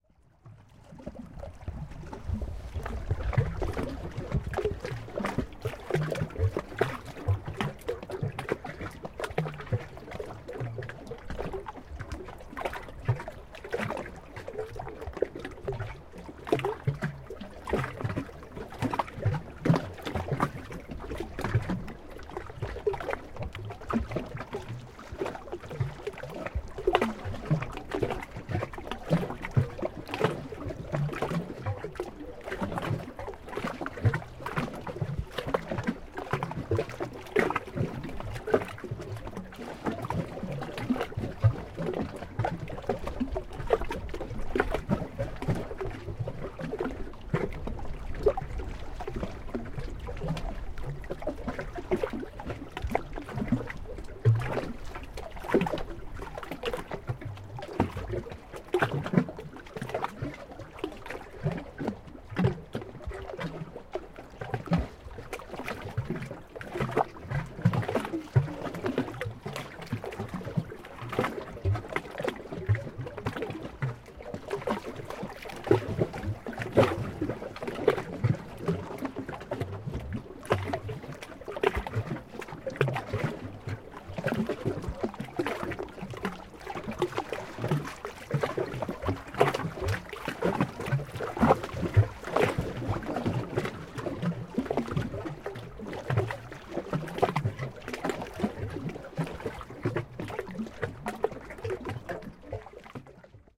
A stereo field-recording of glugging waves on a lake shore.Recorded on the lee side of the lake at the base of a cliff which has an undercut before entering the water. Recorded by traversing out on the cliff, leaning out and hanging by my left fingertips while pointing the recorder at the source. Zoom H2 front on-board mics.